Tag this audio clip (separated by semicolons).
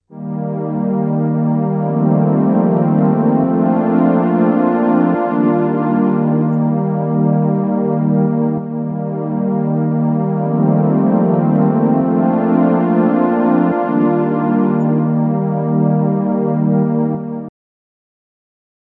ambient; chill; pad; strings